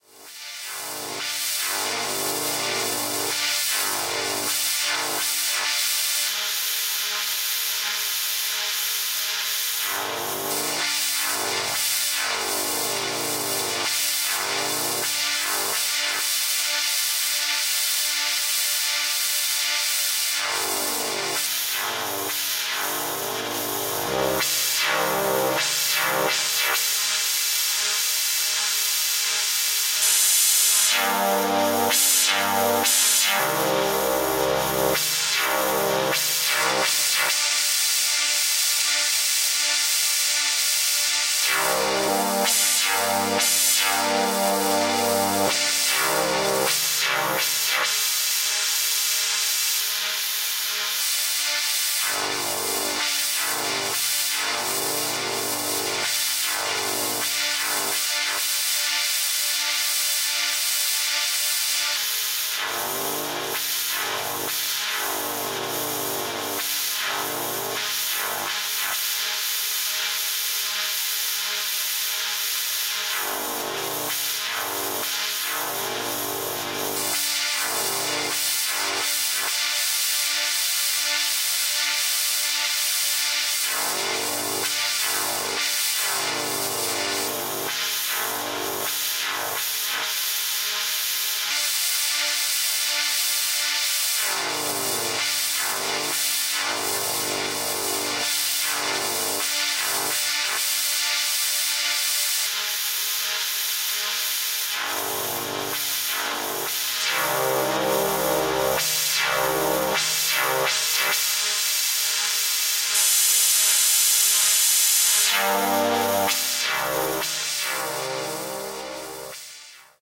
1.This sample is part of the "Padrones" sample pack. 2 minutes of pure ambient droning soundscape. Pure ambient sweeping.
reaktor, soundscape, ambient, drone, effect, electronic